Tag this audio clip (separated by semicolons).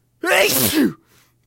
Sneeze; Mouth; Funny; Nose; achoo